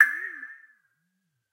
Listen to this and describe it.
ss-pingaling sn
A commodore 64 / submarine tone
electronic, snare